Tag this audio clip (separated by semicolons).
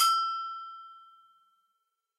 bells; cha-cha; hit; latin; percussion; samba